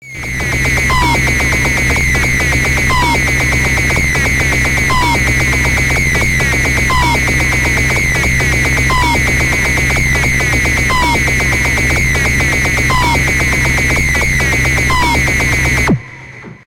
Made on a Waldorf Q rack
screech, waldorf, synthesizer, loop, harsh, noise, 120bpm